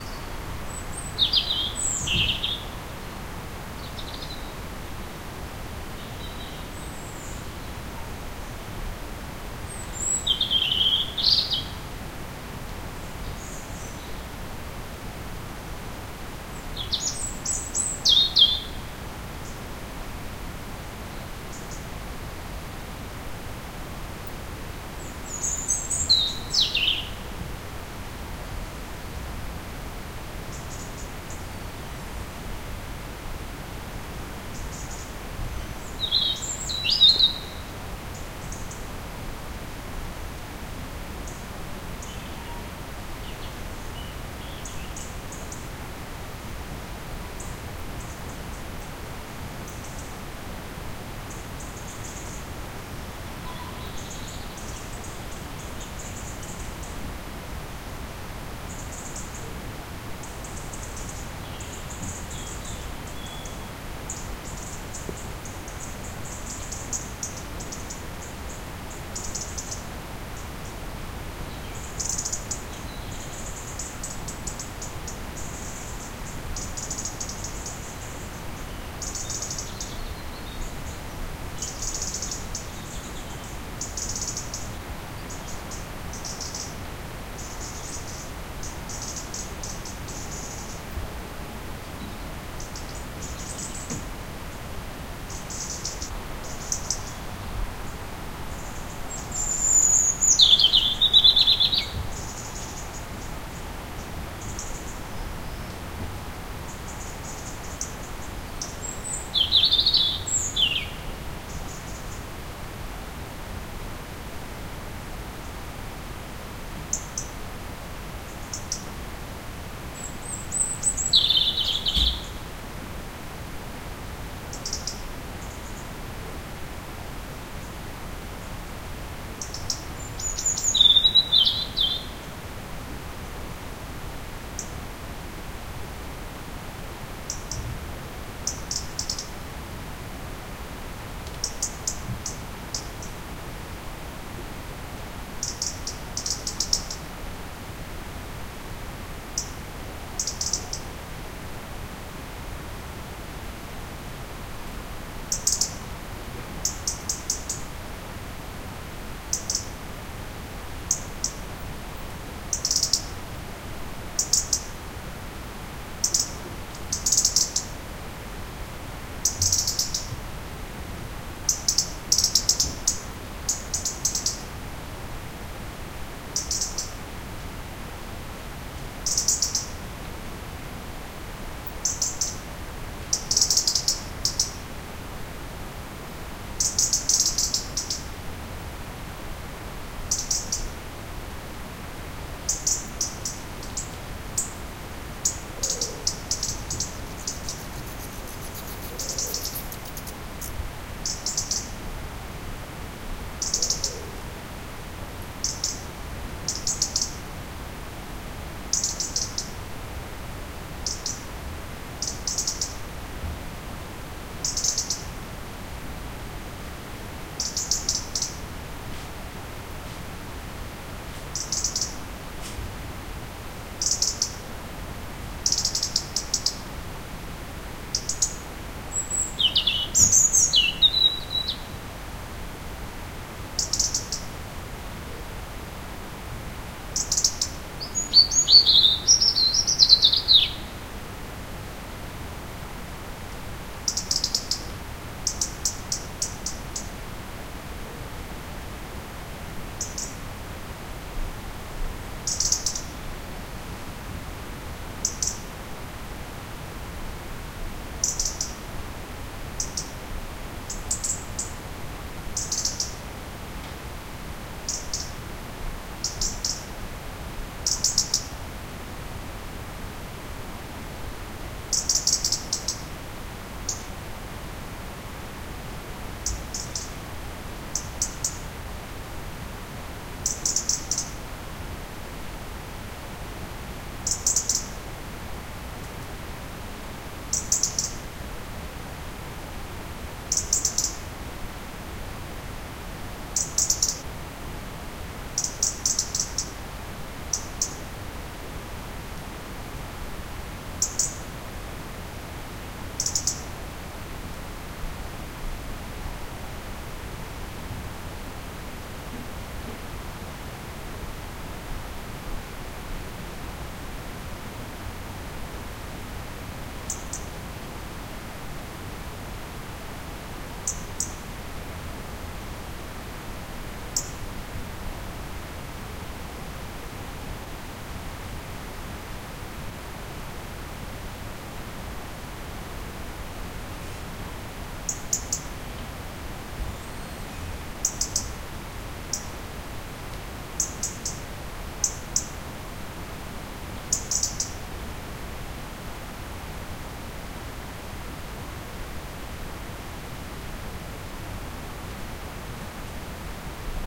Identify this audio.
D790 Vogels voor de molen loop
I stayed in an old watermill in Brittany this autumn, and recorded the late-afternoon silence and birdsongs. Relaxing! Some soft noises from the house can be heard.